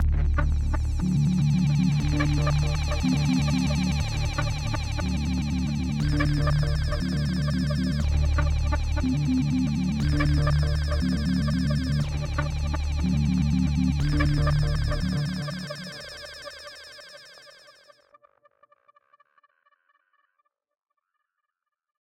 Silver Alien Factory
designed with two synthesizers: Malstroem & Da Hornet
aliens, drone, soundscape